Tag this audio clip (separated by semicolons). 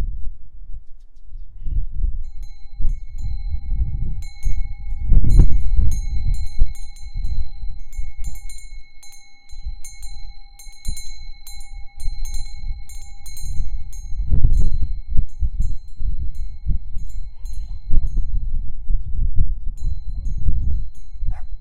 Korea; Bell; WIndbell